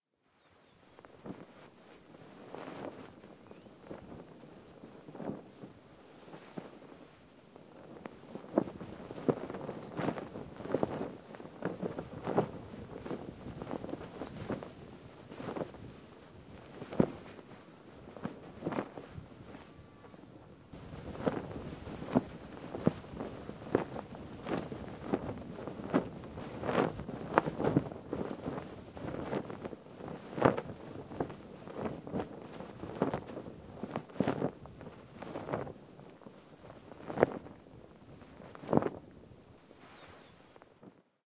A mono field-recording of cotton sheets drying on a clothesline and flapping in the breeze.. Rode NTG-2 with deadcat > FEL battery pre-amp > Zoom H2 line in.